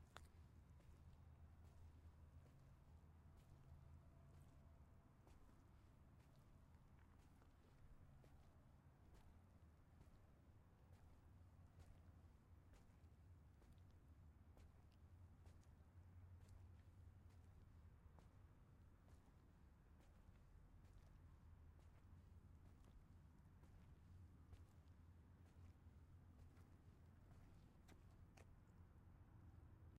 Footsteps Pavement Walking
walking on pavement outside
walking, pavement, footsteps